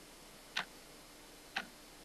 tick tock clock
This is a simple sound of a clock ticking, it only has one tick tock in it, so its super short, I think it recorded this with a zoom h2n using a at875r mic
analog-clock, clock, clockwork, grandfather-clock, impending-time, tac, tic, tick, ticking, ticks, tick-tock, tics, tic-tac, time, timepiece, tock, wall-clock